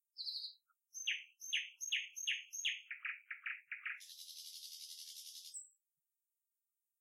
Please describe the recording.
Little bird tweets.
If you enjoyed the sound, please STAR, COMMENT, SPREAD THE WORD!🗣 It really helps!